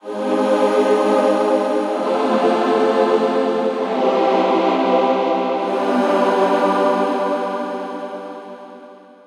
Choir chords made in Fl Studio Sytrus. Chord Progression is A Minor, F, C, G.